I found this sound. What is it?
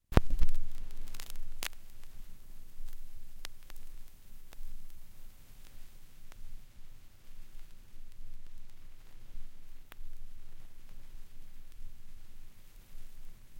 Putting a the needle of a record player down on a record and the noise before the start of the music.